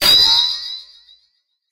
Rikochet V1 Light 3
Rifle, videgame, Light, SciFi, Heavy, Fire, Gunshot, Pulse, Machine, Pew, Blaster, Rikochet, Loud, Shot, Bang, Shoot, Gun, Laser